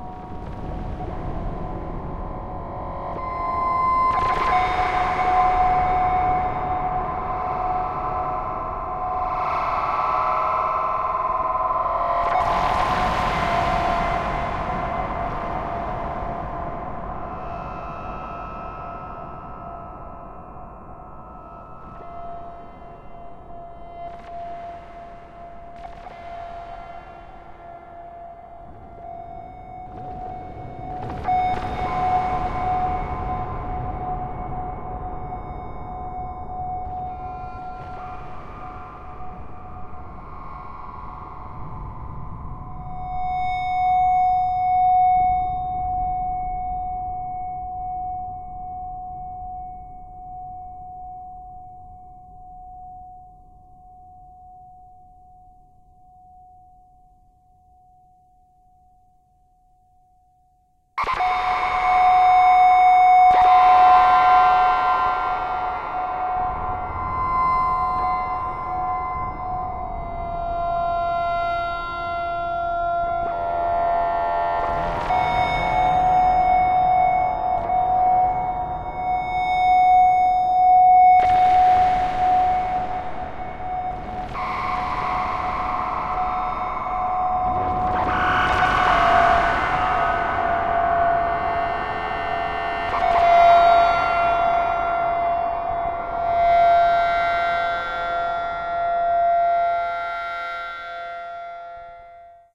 made by supercollider
effect, fx, horror, lovecraft, sound, supercollider